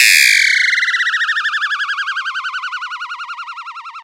Simple FX sounds created with an oscillator modulated by an envelope and an LFO that can go up to audio rates.
In this sound the LFO starts quite rapid, but still not really audio rate.
Created in Reason in March 2014